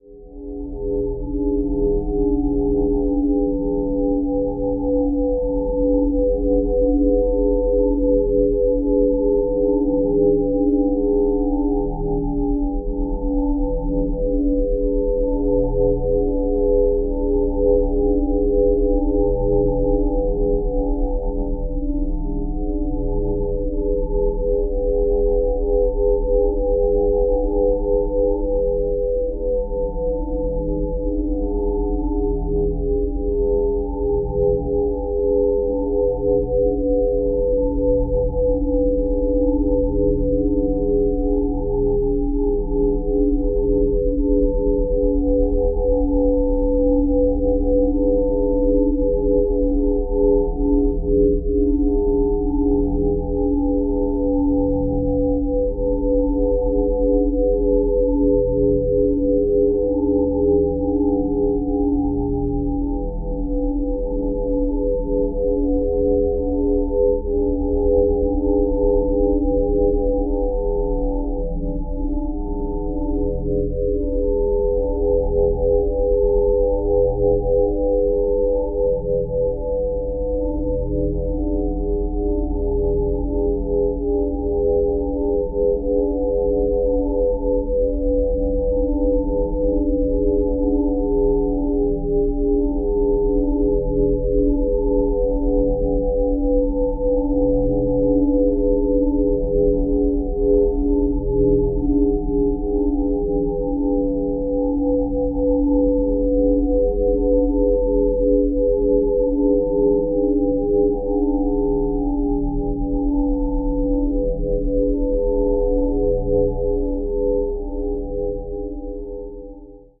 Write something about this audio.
This sample is part of the "SineDrones" sample pack. 2 minutes of pure ambient sine wave. Dense weird horror and dark atmosphere. Another variation with some slower evolving waves.